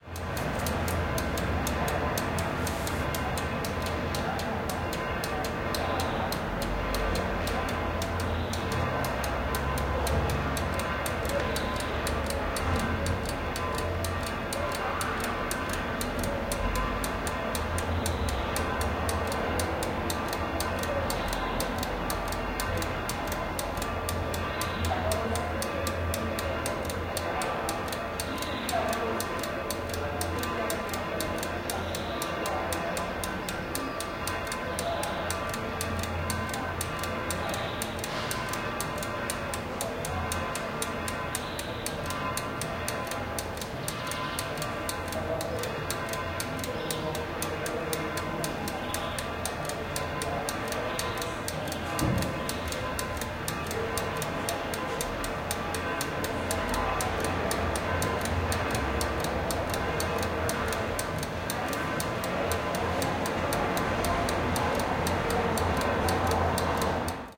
20170423 decoded.midside
In this decoded sample the proportion of central and lateral signal are equal (i.e., 50/50), but one can change this at will. Actually, whats cool of M/S recording is that, when decoding, you can tweak the mix. For example, one could increase the relative importance of the lateral component (i.e., the sound from the figure-8 mic) or from the centre (i.e. the shotgun ). Or the opposite, set the focus on the centre of the sound image by increasing its relative contribution to the final mix.
Gear used Sennheiser MKH 60 + MKH 30 into Shure FP24 preamp, and Tascam DR-60D MkII recorder. Decoded to mid-side stereo with free Voxengo VST plugin.